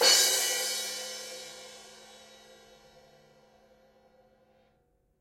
CRASH A MS1
Mid/Side Crashes, Variation #4
1-shot, crash, crash-cymbal, crescendo, DD2012, drums, mid-side, percussion, stereo